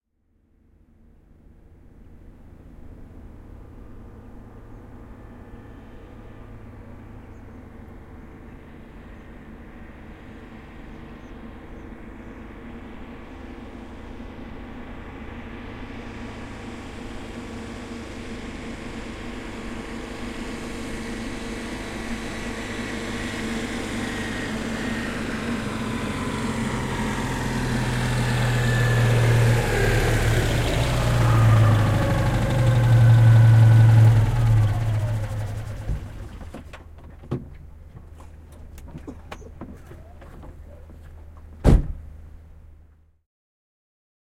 Henkilöauto, tulo asfaltilla / A car approaching, stopping, shutting down, car door, Citroen 2 CV, a 1981 model
Citroen 2 CV, vm 1981, rättisitikka. Lähestyy hitaasti asfaltilla, pysähtyy lähelle, moottori sammuu, auton ovi auki ja kiinni. (Citroen 2 CV 6, 4-taht. , 0, 6 l, 28 hv).
Paikka/Place: Suomi / Finland / Vihti
Aika/Date: 09.08.1995
Finnish-Broadcasting-Company, Field-Recording, Yle, Finland, Autoilu, Auto, Soundfx, Yleisradio, Cars, Motoring, Autot, Tehosteet, Suomi